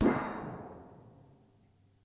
owner of a lonely fart2
Metallic version of the most recognized rap hit in a progressive rock song ever. I am supposed to be fixing the grill with a piece of sheet metal but when I picked it up I heard the noise and could not resist. All I hear is more hiss. Must be the Samson USB microphone.
bang, boing, clang, hit, metal, rap